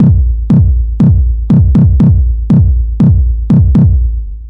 4-times bass rhythm based in electronic music concepts